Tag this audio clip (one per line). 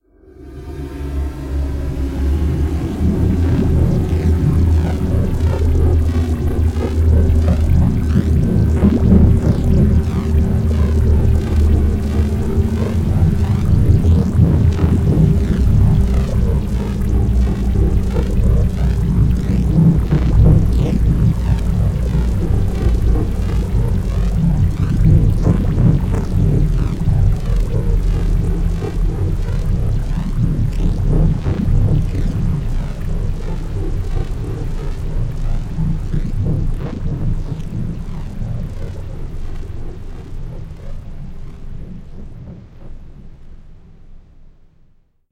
atmosphere
cinematic
fi
Future
game
Hover
Machine
Pulse
sci
video